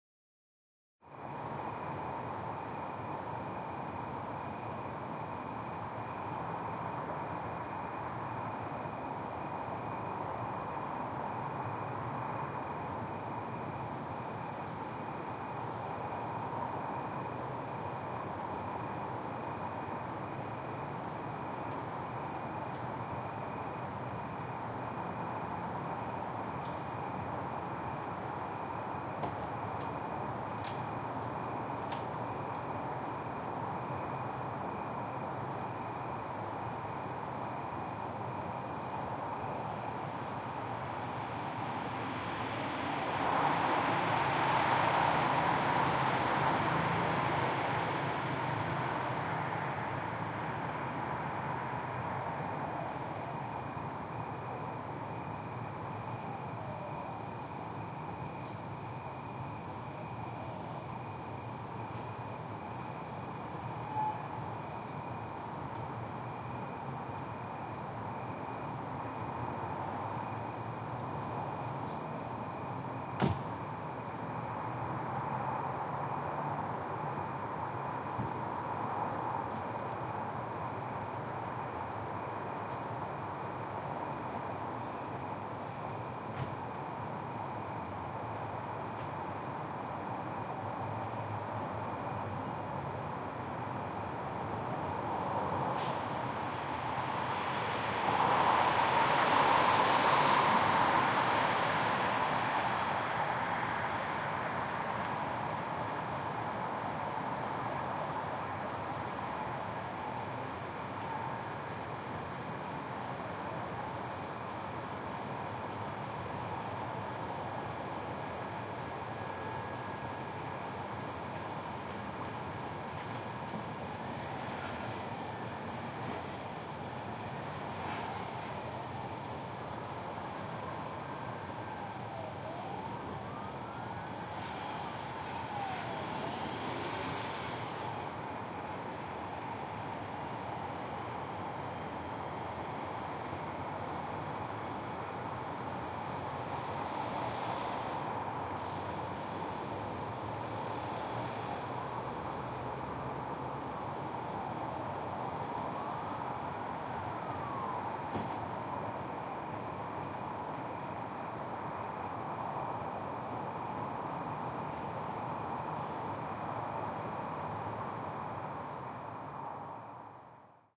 Cricket at the road
Recording of the Prague ambiance in the evening.
Recorded at night in august, on the Prague periphery. Crickets, cars, trams, sirens.
Recroded with Sony stereo mic on HI-MD.
praha, night, evening, city, amb, prague, cricket